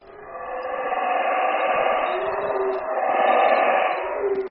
loud monkey on temple in Belize 2015